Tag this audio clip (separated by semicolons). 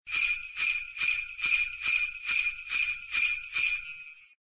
bells; christmas; alert; phone; mobile; sleigh-bells; telephone; ring-tone; cell